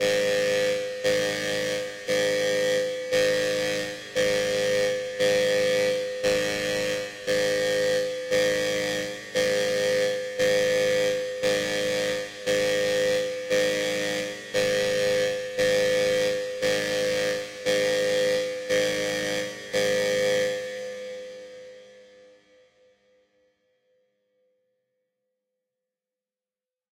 Buzzer Alarm 1
A klaxon alarm.
Made in FL Studio.
Hunting trolls since 2016!
BTC: 36C8sWgTMU9x1HA4kFxYouK4uST7C2seBB
BAT: 0x45FC0Bb9Ca1a2DA39b127745924B961E831de2b1
LBC: bZ82217mTcDtXZm7SF7QsnSVWG9L87vo23
alarm, alert, buzzer, emergency, klaxon, military, warning